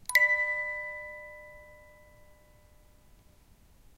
one-shot music box tone, recorded by ZOOM H2, separated and normalized
bell, box